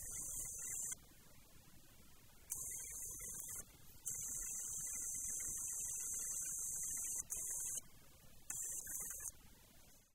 VendingMachine,Dollar,Rejected,Whirr,Mech,Security,CameraTurret
Part of a series of various sounds recorded in a college building for a school project. Recorded with a Shure VP88 stereo mic into a Sony PCM-m10 field recorder unit.
field-recording, sfx, school